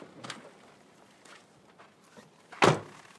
Car door closing SFX that I used in a college project!
car, car-door-closing, door, vehicle